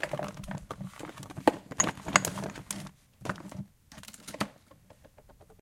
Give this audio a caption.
Dull rummaging through objects